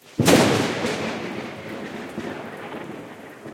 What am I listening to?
Close thunder from a lightning strike, this was edited in audacity